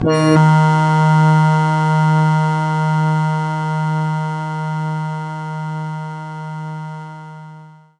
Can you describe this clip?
PPG 014 Sustained Organwave E3
This sample is part of the "PPG
MULTISAMPLE 014 Sustained Organwave" sample pack. The sound is similar
to an organ sound, but at the start there is a strange attack
phenomenon which makes the whole sound weird. In the sample pack there
are 16 samples evenly spread across 5 octaves (C1 till C6). The note in
the sample name (C, E or G#) does not indicate the pitch of the sound
but the key on my keyboard. The sound was created on the Waldorf PPG VSTi. After that normalising and fades where applied within Cubase SX & Wavelab.
organ, sustained, multisample